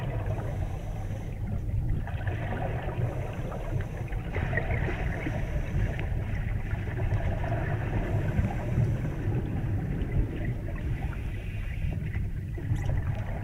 Scuba Tanks - Breathing, dive
Scuba tank breathing sounds recorded underwater
swimming, scuba-tanks, underwater